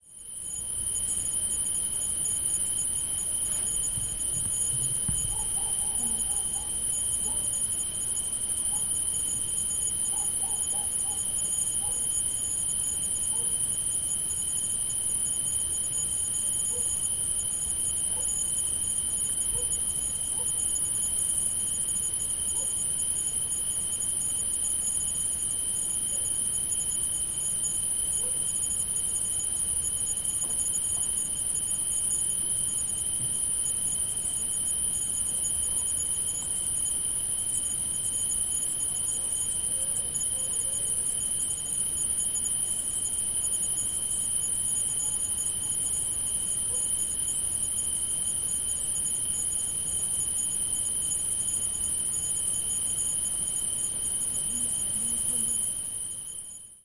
Ambience : Night in nature (South of France) - 1
Ambiance d’une nuit dans la nature dans le Sud de la France. Son enregistré avec un ZOOM H4N Pro et une bonnette Rycote Mini Wind Screen.
Sound atmosphere of a night in nature in south of France. Sound recorded with a ZOOM H4N Pro and a Rycote Mini Wind Screen.